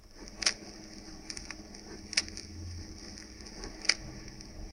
The cracking of a vinyl.
Recorded with an Alctron T 51 ST.
{"fr":"Grésillements vinyle 2","desc":"Les grésillements d'un vinyle.","tags":"musique vinyle gresillement retro"}